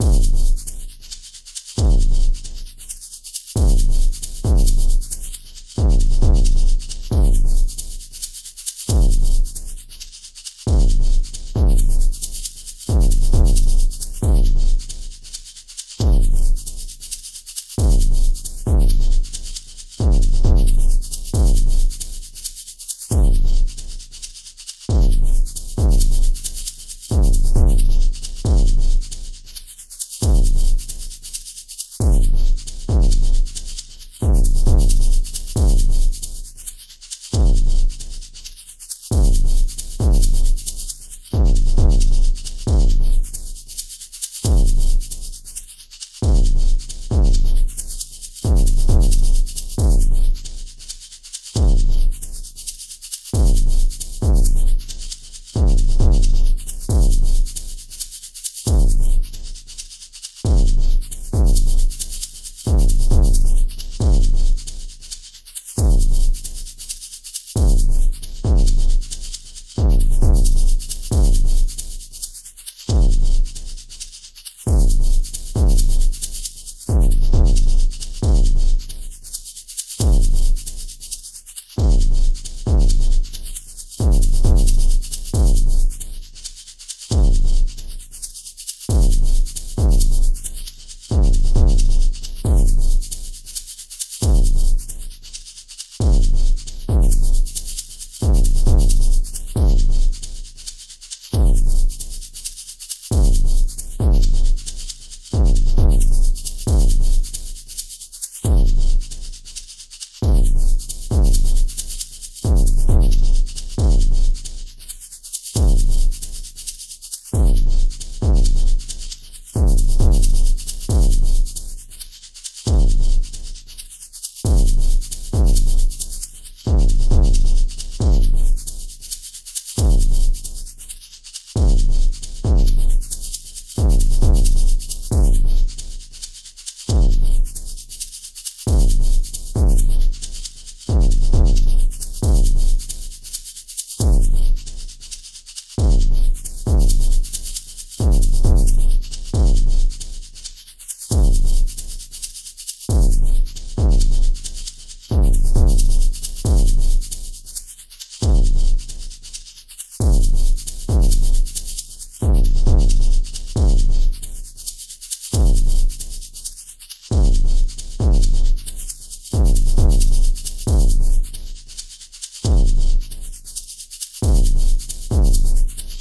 produced in reason as a loop for getting my timing on a certain track in pro tools.........
very dark when played by itself, tekstep, darkstep
bass, hard, tekstep, loops, drum, dark, jungle, loop